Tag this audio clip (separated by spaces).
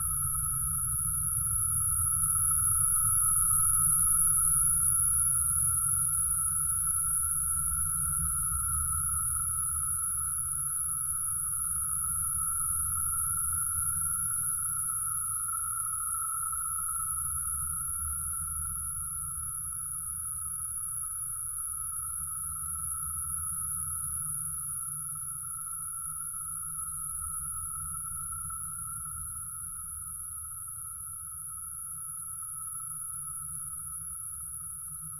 electronic experimental sound-art